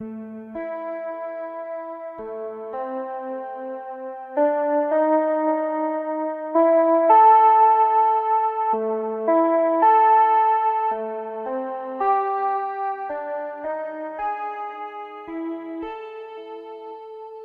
Morphagene reel. Piano loop no splices
mgreel, morphagene, loop, piano